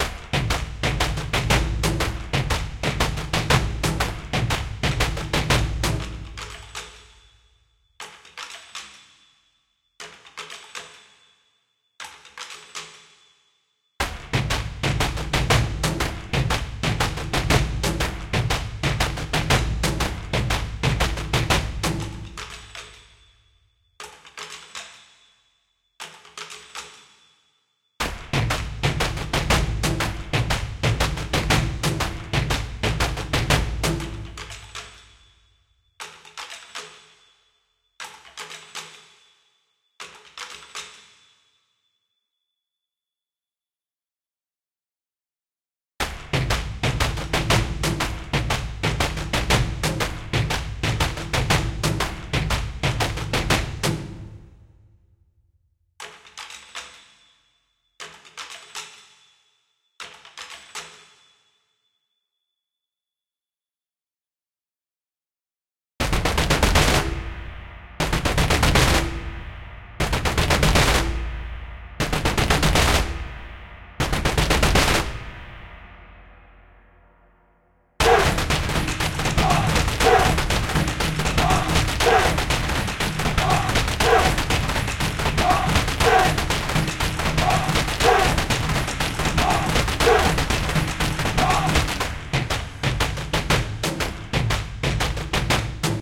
Beat Ethno Drum Body Taiko Japan